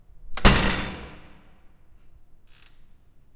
Prison Locks and Doors 2 Door slam
From a set of sounds I recorded at the abandoned derelict Shoreditch Police Station in London.
Recorded with a Zoom H1
Recorded in Summer 2011 by Robert Thomas
doors, latch, lock, locks, London, Police, Prison, scrape, Shoreditch, squeal, Station